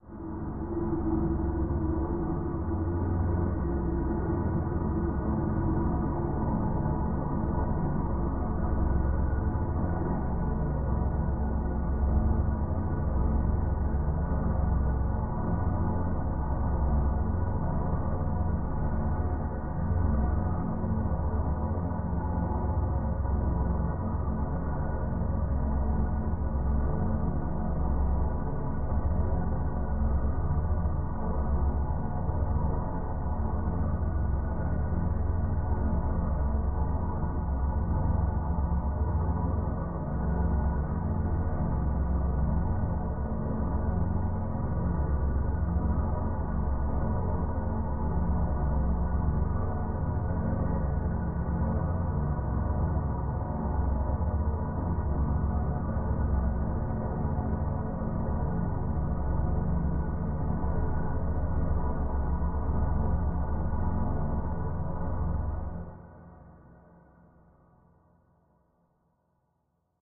This sample gives the ambience of the Propulsion Hall of a space hulk. It is a large area bristling with the power of an interstellar drive which is currently just ticking over.
The sound was recorded using Reaper and created using sounds from AIR Loom, Magix Independence Free and treated with various effects (reverb, phasers, chorus, etc.).